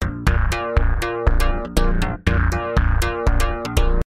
doa bass 120bpm
loop, techno, pwl, dx7, bass